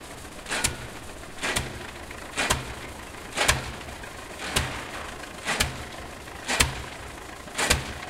construction nail pile 1
construction building-construction tractor noise rumble growl pile nail-pile city
building-construction, city, construction, growl, nail-pile, noise, pile, rumble, tractor